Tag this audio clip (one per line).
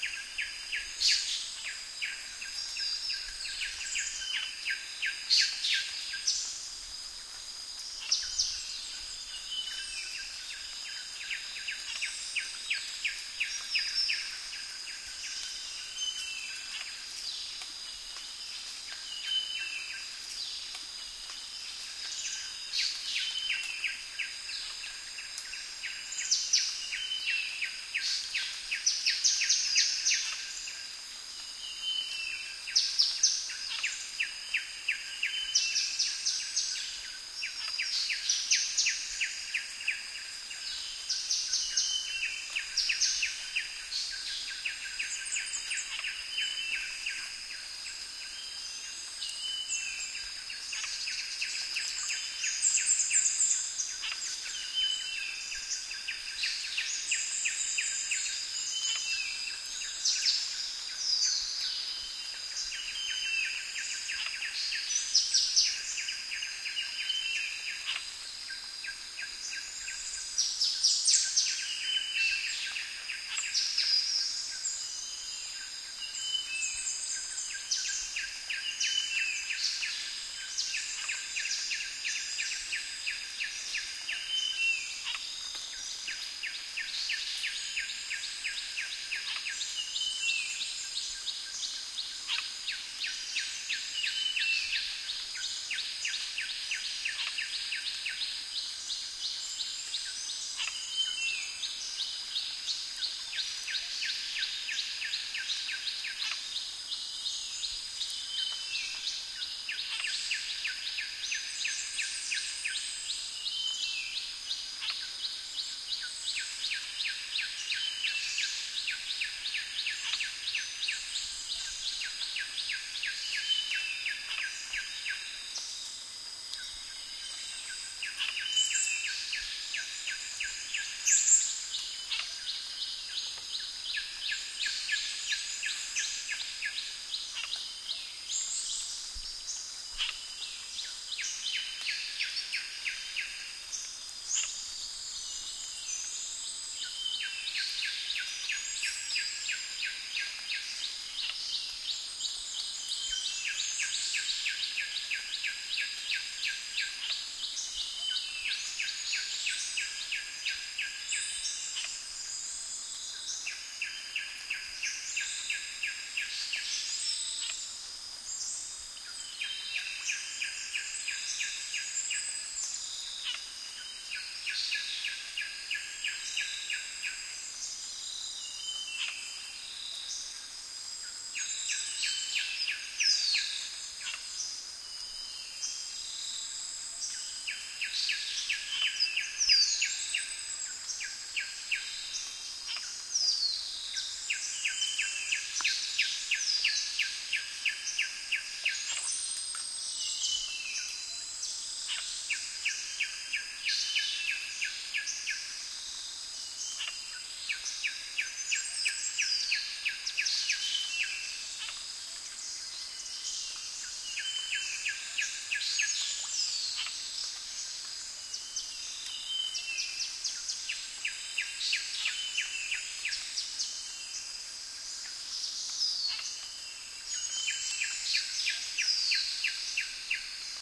crickets
morning
water